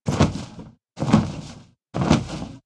Dragon Wing
A couple of cloth flaps recorded with AT2020 mic through a Audient iD4 interface.
Enjoy!
Swing, fly, Cloth, Flap